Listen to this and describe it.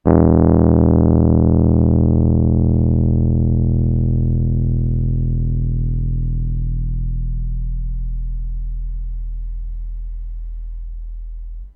Rhodes bass E
I recorded the lowest note of my Fender Rhodes, Mark VI, 73. With that special crunchiness